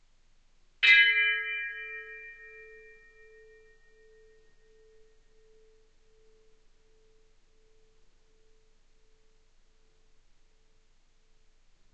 This version is 60% slower than the original. Edited in Audacity 1.3.5 beta
bell, bing, brass, ding